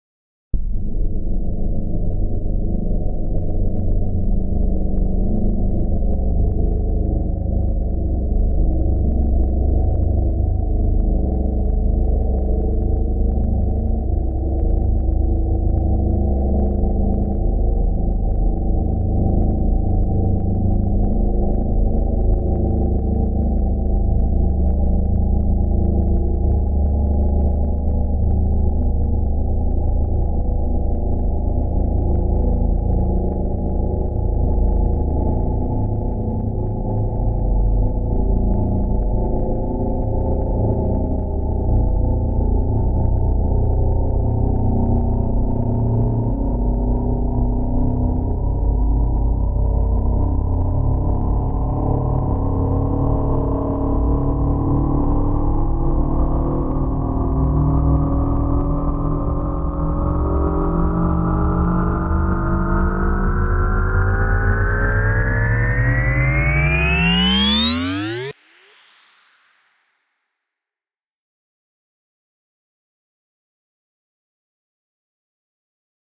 warpdrive-long
The result of a preset made a long time ago in Logic Pro's Sculpture PM Synth. This pack contains a few varied samples of the preset.
build, cruiser, drive, gun, hyper, laser, long, pitch, sci-fi, ship, space, spaceship, star, synth, warp